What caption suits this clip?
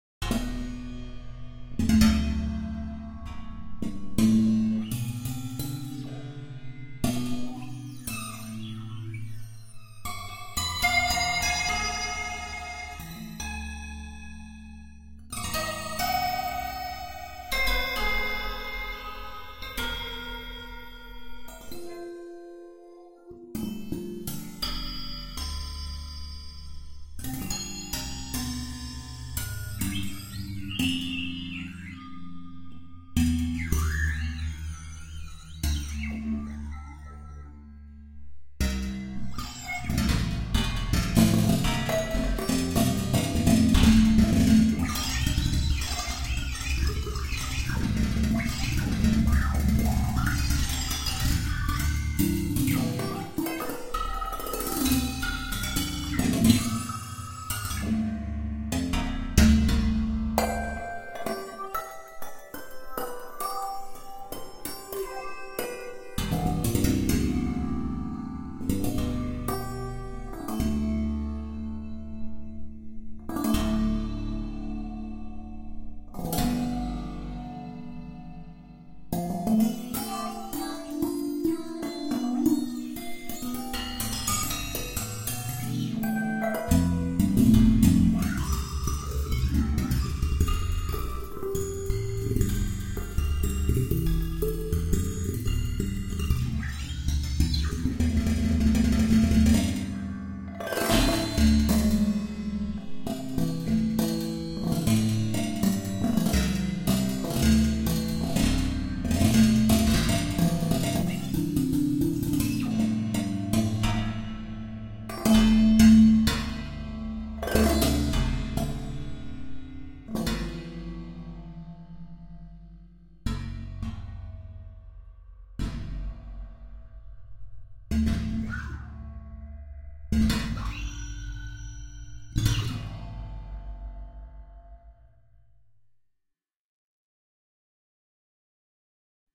Short soundscapes generated in the percussion synthesizer Chromaphone, a physical modeling synthesizer, recorded live to disk. In honor of the great abstract guitarist Fred Frith.
fret, mallet